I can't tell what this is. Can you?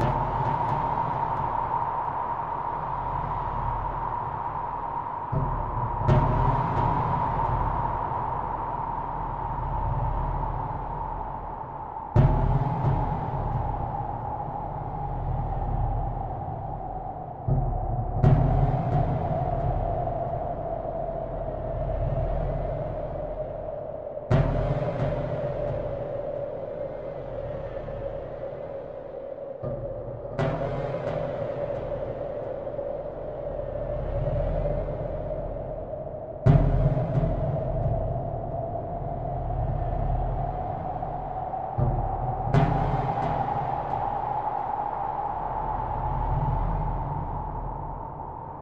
SSL Wind
A combination of a SSL plug-in and an Apple Loop. I took a Apple Loop of orchestral drums and put them through the SSL Orcism-X Plug-in...and you have weird sounding wind. Enjoy!